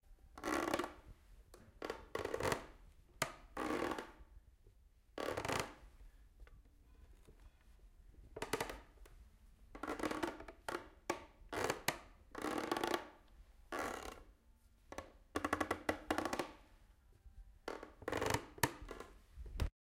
Wooden Stairs
Walking on creaking stairs
close, creak, creaking, creaky, door, floor, foley, footstep, hardwood, hardwood-floor, open, squeak, squeaking, squeaky, stair, stairs, steps, walking, wood, wooden